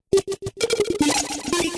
324 beats per minute